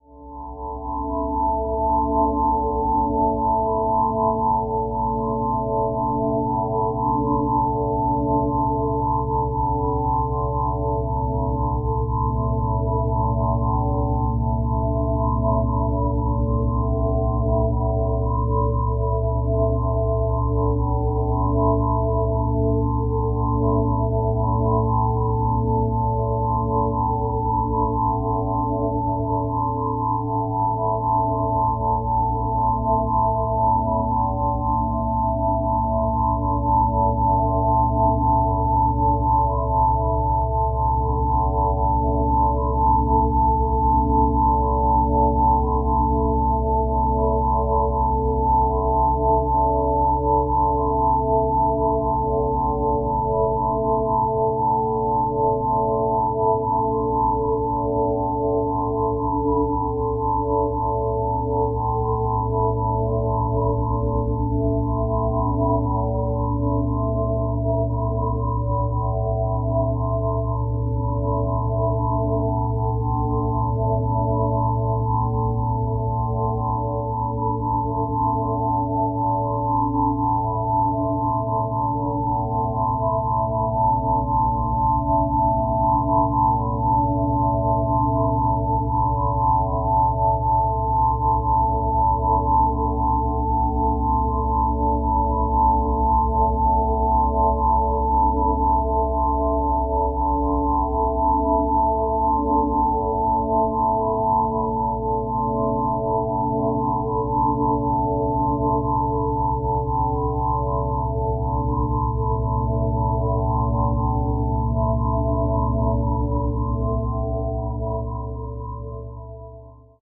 This sample is part of the "SineDrones" sample pack. 2 minutes of pure ambient sine wave. Weird melodies plus a low drone.